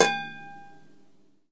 The ding
Simple ding with a pot of water